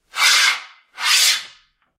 Metal Slide 6
Metal on Metal sliding movement
Metal, Metallic, Movement, Scrape, Scratch, Slide